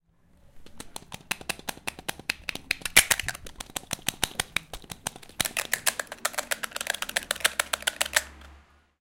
Sounds from objects and body sounds recorded at the 49th primary school of Athens. The source of the sounds has to be guessed.